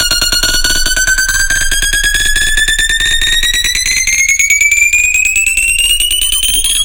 Build 140 BPM
140BPM, Build, High, Sharp